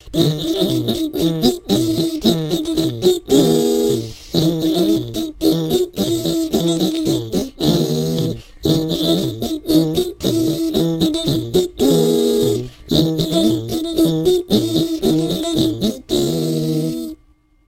Sounds like the ant marching bands in the old Warner Brothers cartoons. Also available as a ringtone through iTunes on your iPhone! Open itunes on your iphone ->search for blimp "ant marching band" -> and download!
com, silly, animation, warner, song, quirky, bunny, mel, funny, bugs-bunny, cartoon, mel-blanc, bugs, marching, band, blimpmusic, podcast-theme, theme, podcast, humorous, music, brothers, flea-circus, blanc, podcast-music, ant